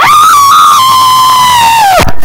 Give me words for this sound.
Scream Sound 2 (Lovely YT Horror SFX)
Another scream I recorded more shorter than the first. Might give the laughs.
creepy, funny, horror, jumpscare, scary, spooky